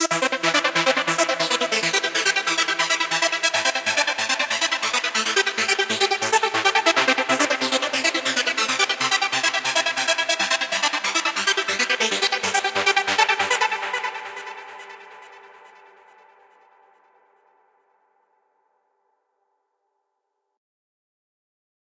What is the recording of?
synth loop with flanger
loop, trance